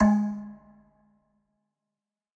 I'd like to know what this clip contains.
Metal Timbale 025
god, timbale, pack, home, drum, record, kit, trash